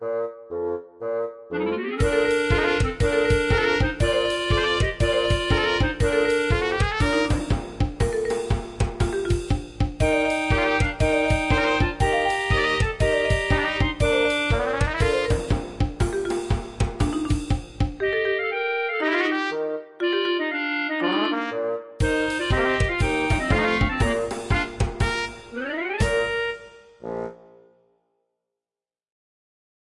Funny Melody - Clown Circus
I came up with this short music file for the carnival season and composed it in MuseScore. It is also possible to loop the sound!
Have fun!
fun, clown, Timpani, jolly, melody, bassoon, joke, marimba, humor, happiness, sketch, giggle, laugh, laughter, Clarinet, funny, circus